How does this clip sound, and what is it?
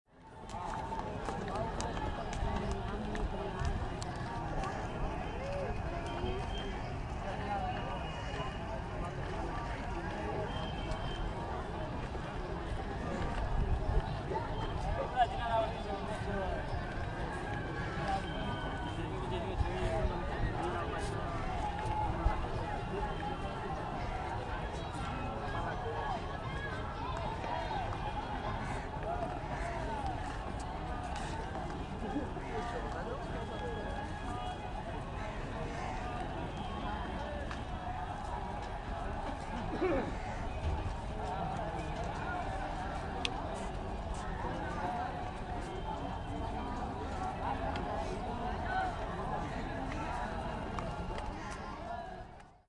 BR 001 India PublicPark
Sitting in a public park, in New Delhi.
I made this short recording in a public park in New Delhi (India).
One can hear steps on stairs and concrete, people talking and listening music, a few wind. In the background, many crows, a rooster, traffic, horns, and hum from the city.
Recorded in September 2007 with a Boss Micro-BR.
soundscape, hum, atmosphere, talking, horns, noise, crows, city, walking, rooster, voices, park